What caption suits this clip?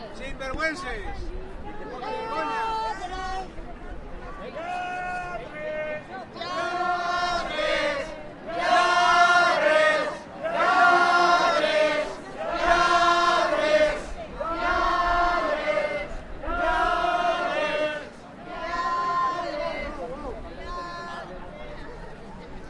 Lladres - Manifestació PAH
Audios recorded during the demonstration for the right to housing 16 February 2013 in Valencia. Protesters shout: Thieves!, referring to the governors.
Audios enregistrats durant la manifestació pel dret a l'habitatge del 16 de febrer de 2013 a València.
PAH, demonstration, manifestaci, protest